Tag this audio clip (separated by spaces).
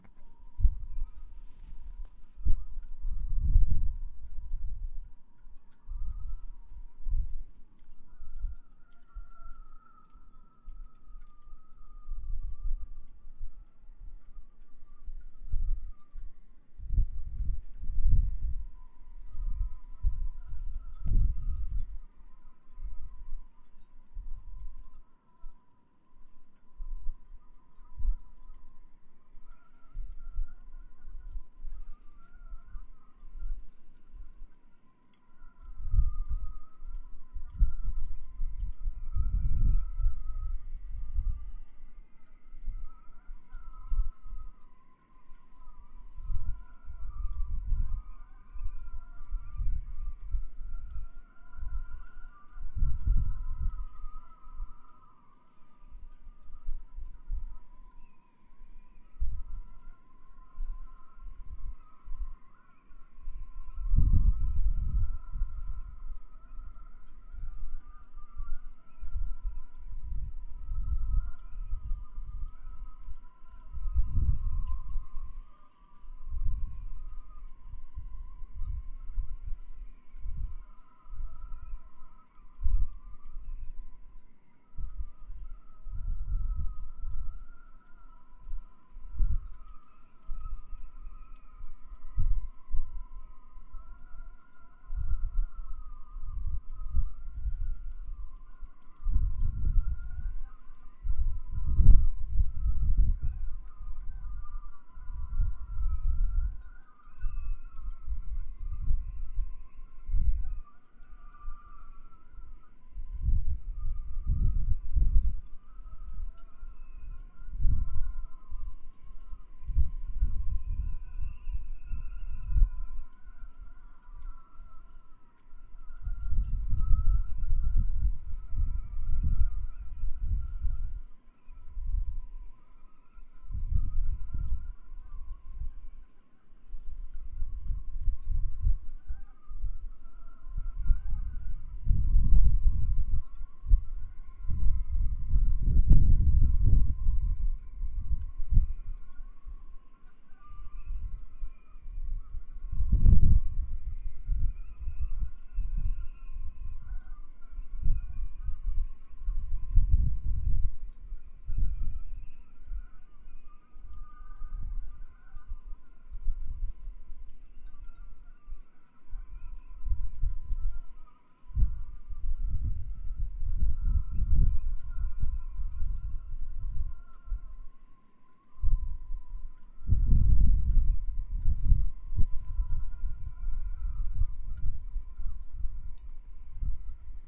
quiberon wind